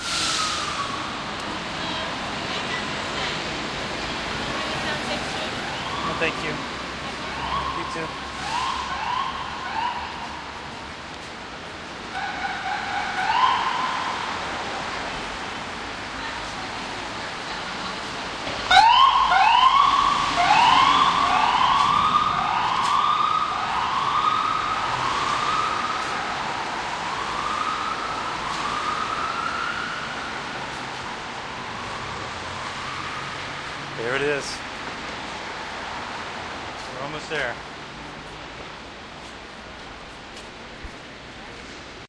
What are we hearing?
nyc west21stst 5thave siren
An ambulance or small fire truck at the intersection of West 21st Street and 5th Avenue in New York City recorded with DS-40 and edited in Wavosaur.
ambiance, field-recording, new-york-city